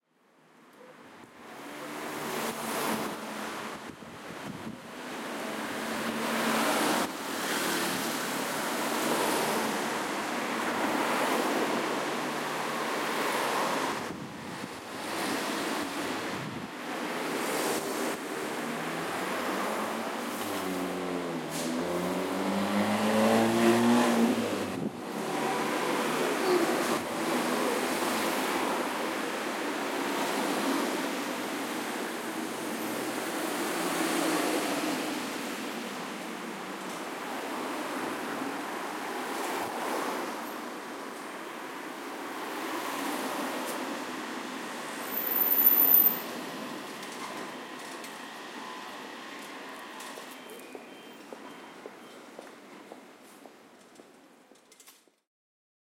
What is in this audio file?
Brazilain Street
It's 4:30 PM and the traffic is increasing as time goes by. Jardim Botanico Street. Thats my neighbourhood.